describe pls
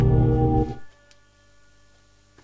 The dungeon drum set. Medieval Breaks
breakcore, amen, dragon, breaks, dungeons, idm, rough, medieval, medievally, breakbeat